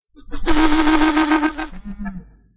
Motor, Small, Electric Eraser
Motor sound of some electric eraser while shaking my hand.
Noise cancelled with Adobe Audition.
I used this sound for
electric, electric-eraser, engine, machine, machinery, motor